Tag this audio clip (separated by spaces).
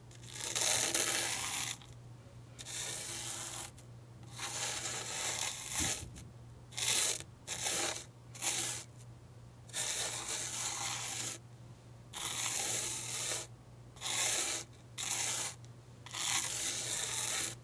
Sand drawing-in-sand Drawing